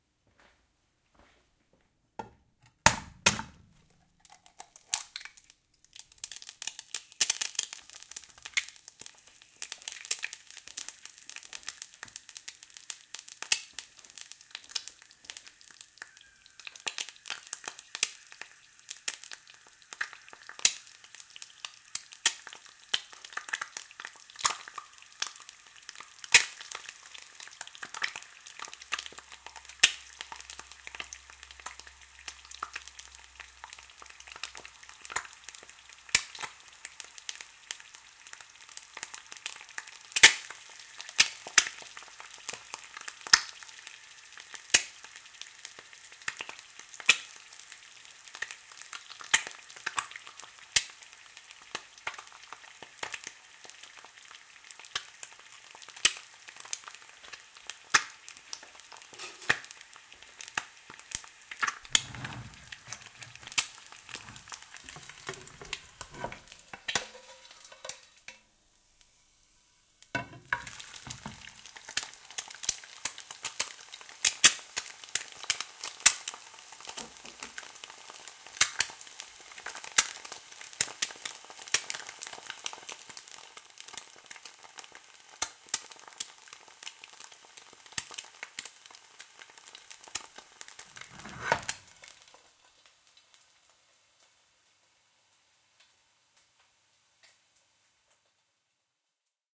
Cracking And Frying An Egg, Over Easy
A stereo recording of an egg being cracked and fried in a pan, about 1m 6s into the recording the pan is tilted and the egg flipped over. Rode NT4 > FEL battery pre-amp > Zoom H2 Line In
sizzle, egg, spit, pop, stereo